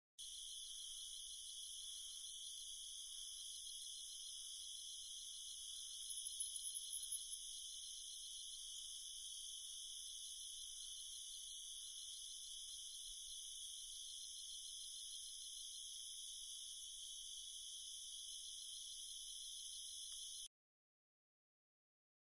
Night Crickets at Bosque Del Apache
Recorded at 2 am at the Bosque Del Apache Wildlife Santuary & Wetlands in New Mexico. Recorded using a Crown SASS-P Microphone with a Tascam DP-1A Dat Recorder